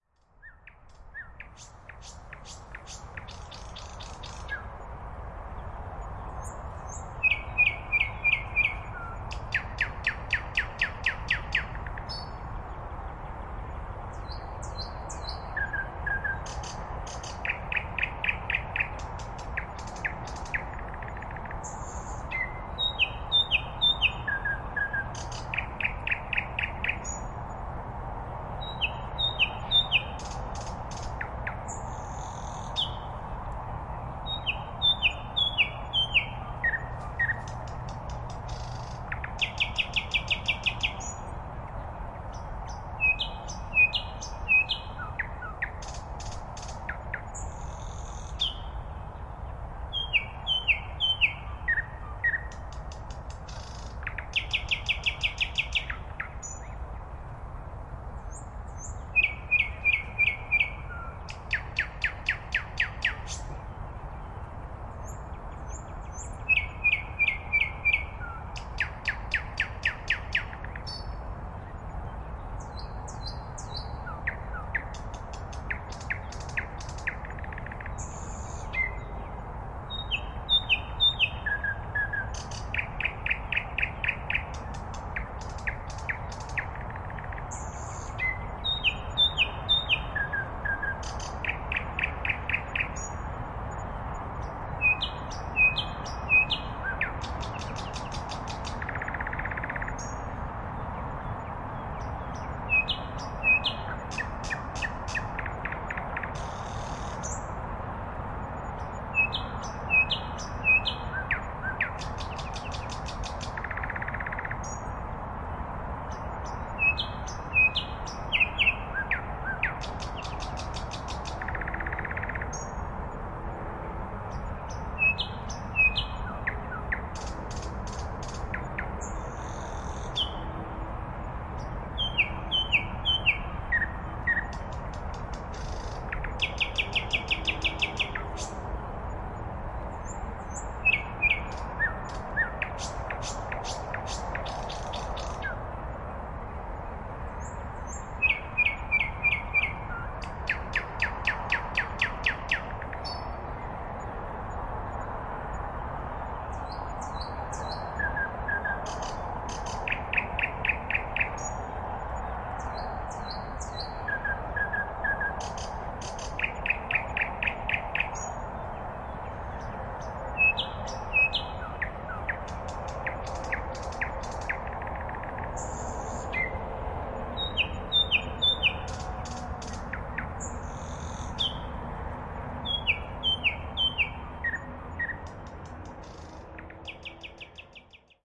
Moscow Borisovo Nightingale night spring(XY)
Ambience of night Moscow park (spring) and sweet nightingale's song.
Distant road hum.
Recorded on ZOOM H6 (XY mic).
ambiance, city, nightingale, nature, field-recording, Russia, birds, park, Moscow